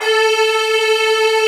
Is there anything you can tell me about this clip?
Synth Strings through home-made combfilter (32 Reason PEQ-2 two band parametric EQs in series). Samples originally made with Reason & Logic softsynths. 37 samples, in minor 3rds, looped in Redmatica Keymap's Penrose loop algorithm, and squeezed into 16 mb!
Combfilter, Multisample, Strings, Synth